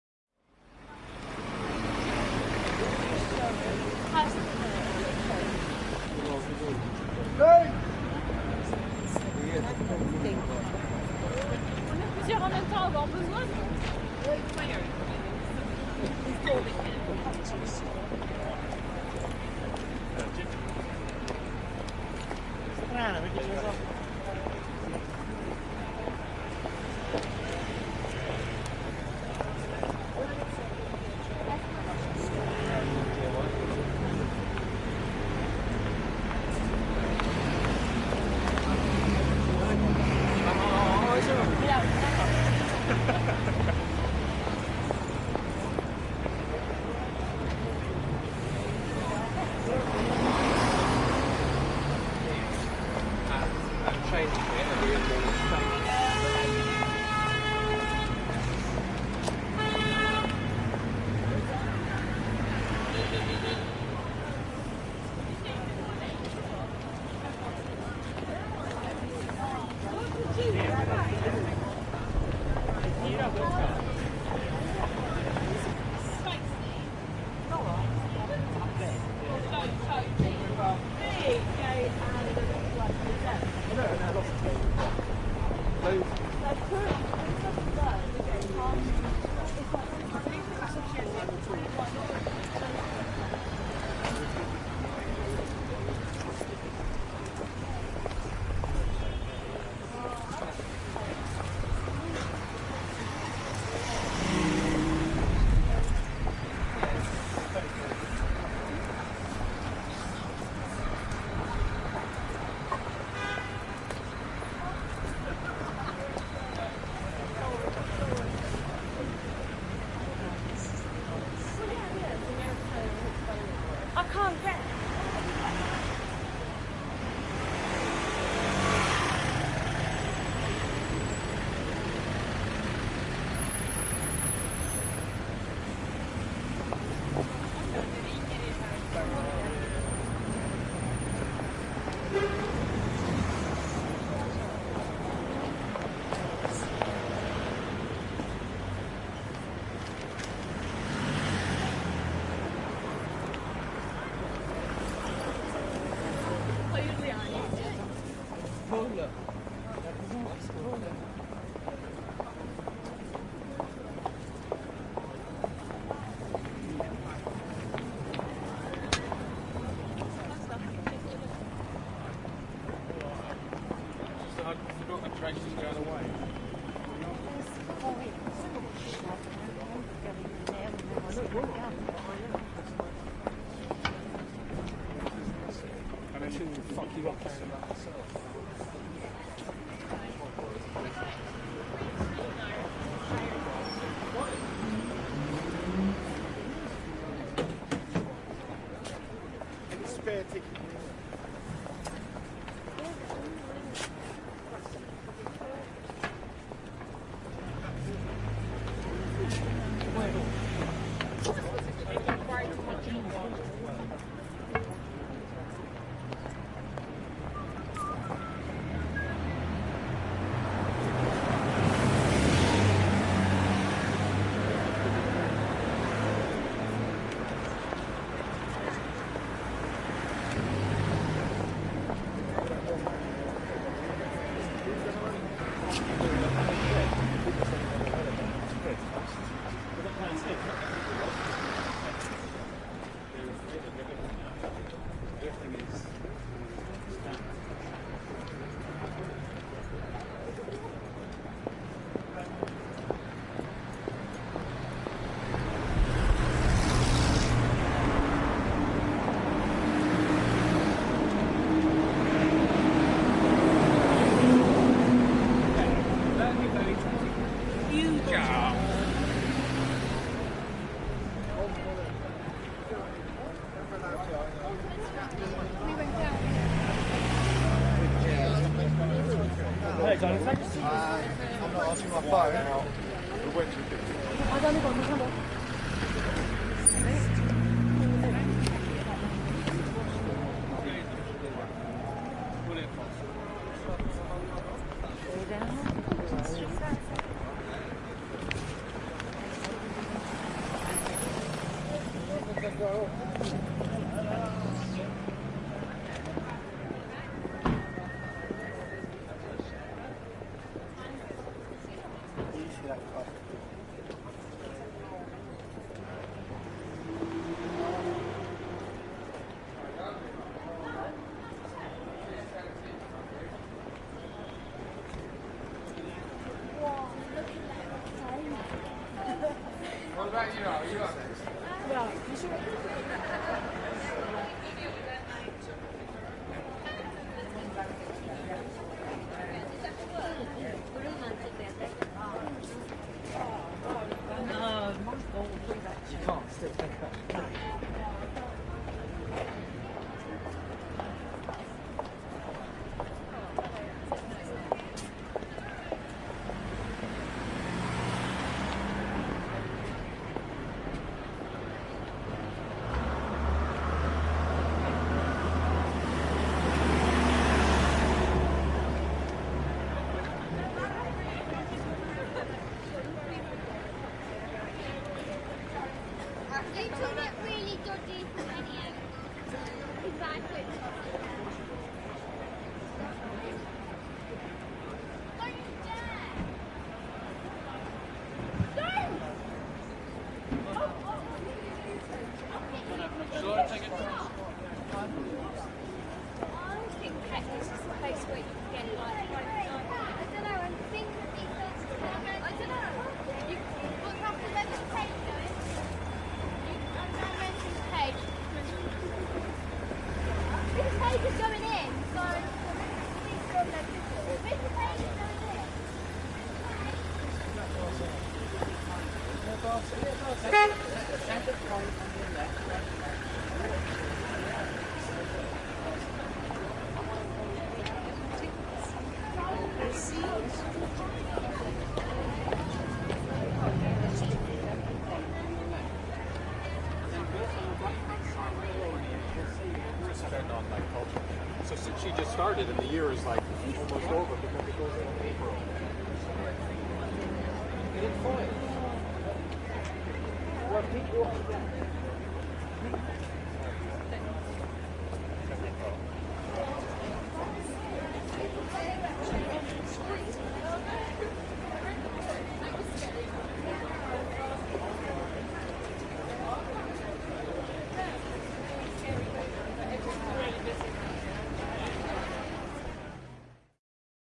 Busy Street Ambience
Field Recording recorded with a zoom H4n.